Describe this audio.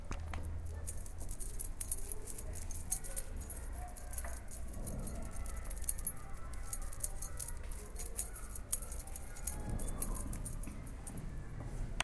mySound AMSP 09
Sounds from objects that are beloved to the participant pupils at the Ausiàs March school, Barcelona. The source of the sounds has to be guessed.